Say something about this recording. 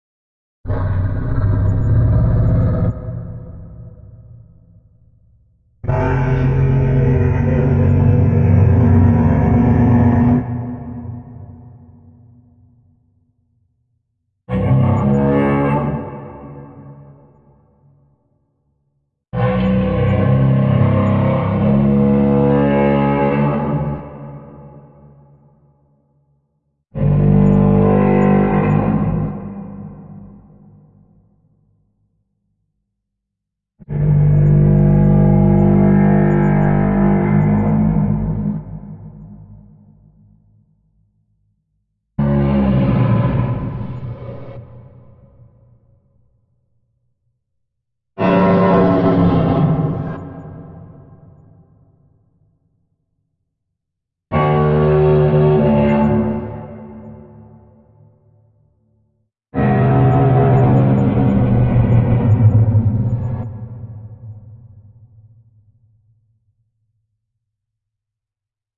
Large Creature Vocals
Couple of variations from only one sound.
Original animal vocalization is from a donkey.